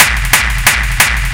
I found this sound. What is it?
xKicks - Womp
Do you enjoy hearing incredible hard dance kicks? Introducing the latest instalment of the xKicks Series! xKicks Edition 2 brings you 250 new, unique hard dance kicks that will keep you wanting more. Tweak them out with EQs, add effects to them, trim them to your liking, share your tweaked xKicks sounds.
Wanna become part of the next xKicks Instalment? Why not send us a message on either Looperman:
on StarDomain:
hardcore, bass, dirty, 180, single-hit, hard, gabber, distortion, distorted, beat, kick, kick-drum